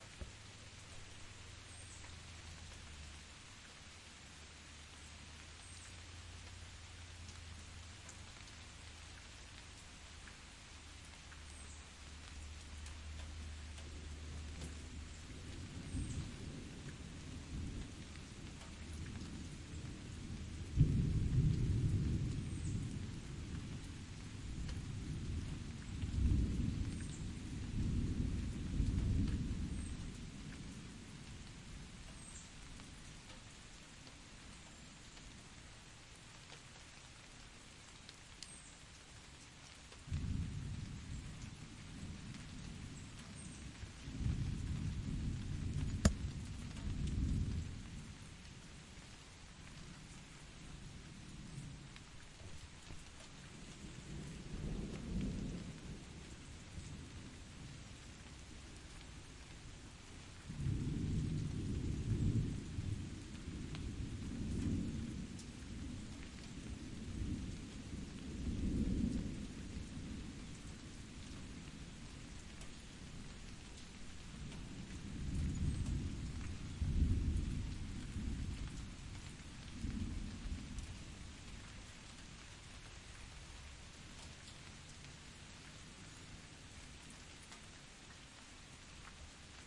rain and distant thunder 1
Rain dripping off my roof. Thunder in the background.